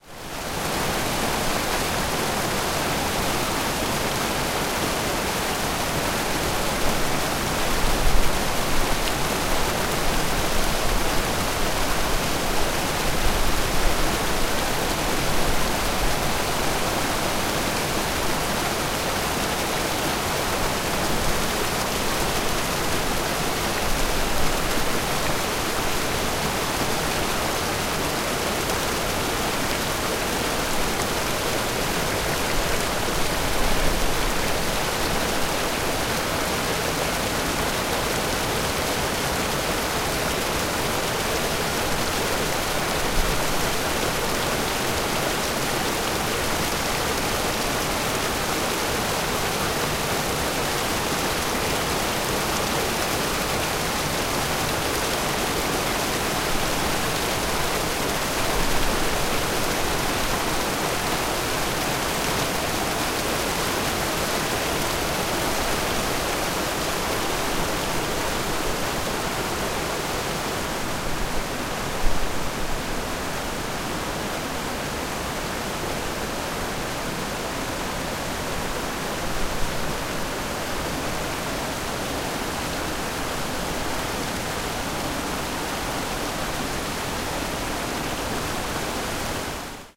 Þingvellir waterfall hard 2
sound of waterfall in Þingvellir, iceland
ingvellir, waterfall